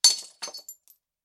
Glass broken with a steel hammer.